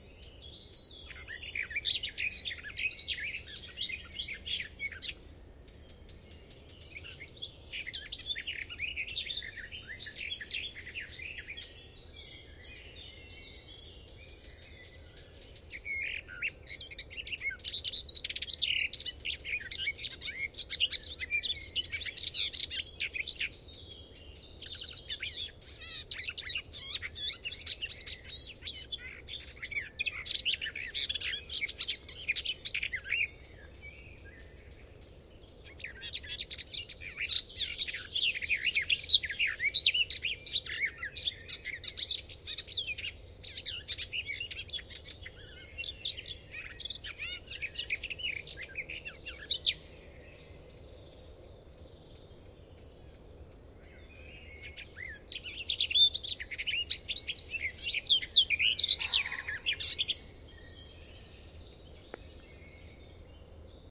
garden warbler 2007 04 25
Garden warbler (sylvia borin) singing at the edge of a forest near Cologne, Germany.Vivanco EM35 over preamp into Marantz PMD 671.
ambient, bird, birdsong, field-recording, forest, garden-warbler, nature, spring